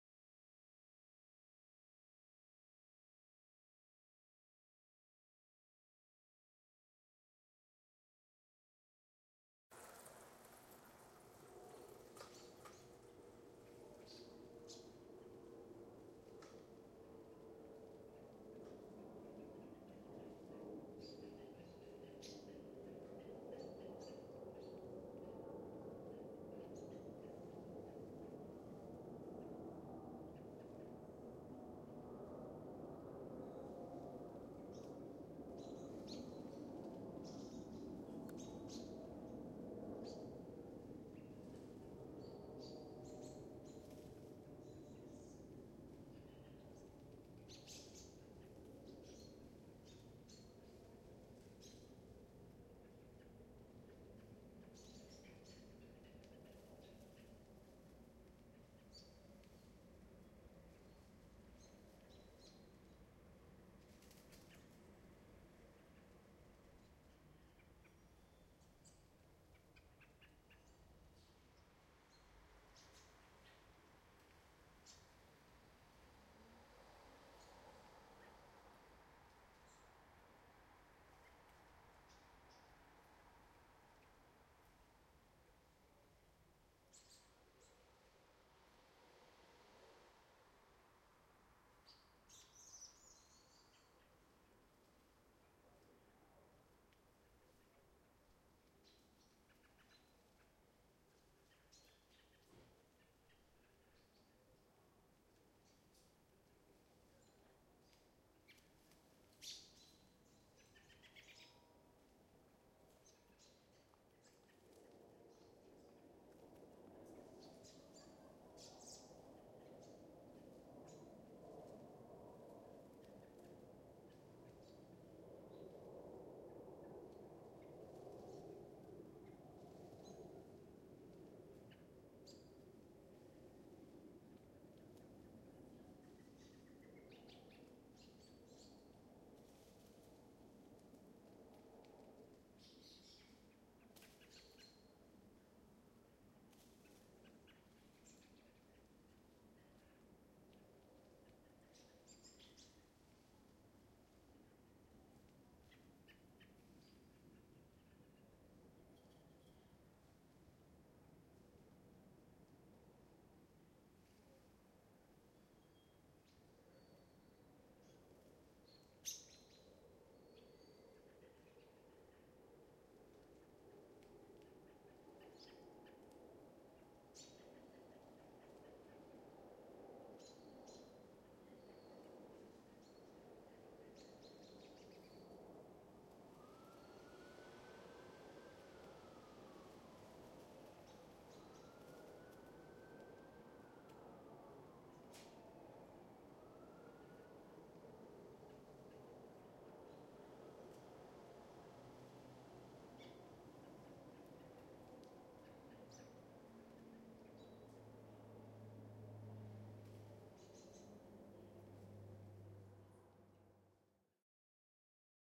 Night Ambience (ch 1/2) R
Night ambience in a major urban city. Ch 1/2 of a 4 channel recording. Nothing fancy.